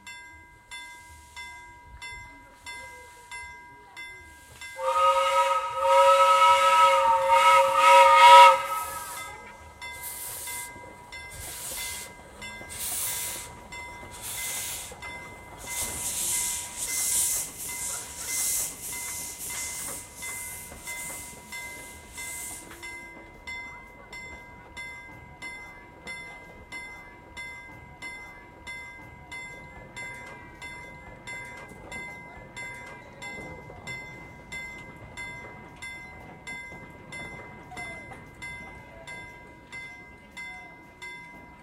A train whistle from a locomotive, recorded with a Sony Ericosson Xperia Arc
train, railroad, horn